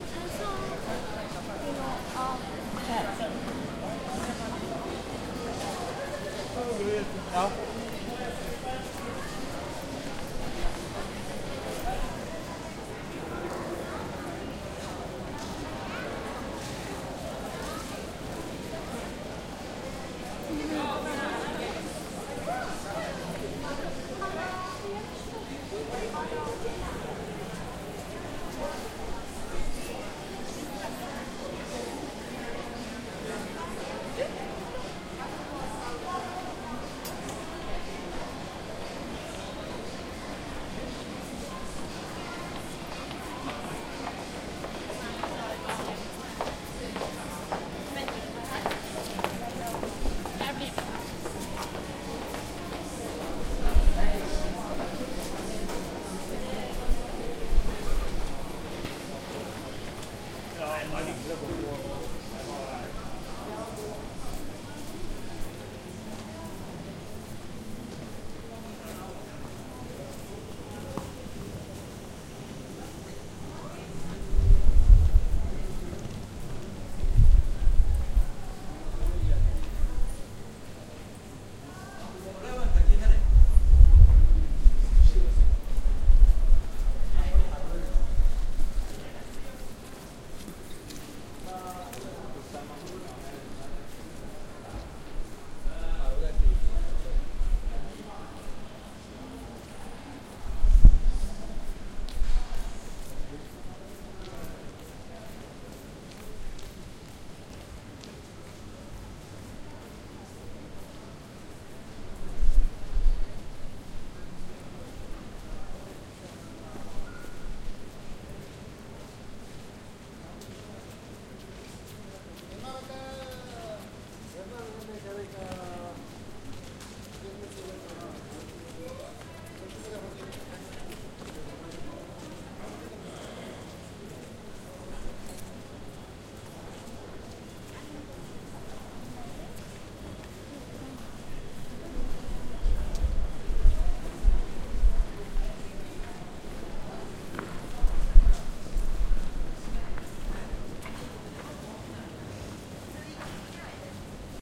Field recording from Oslo Central Train station 22nd June 2008. Using Zoom H4 recorder with medium gain. Moving slowly around main concourse.
oslo, norwegian, norway, train-station, atmosphere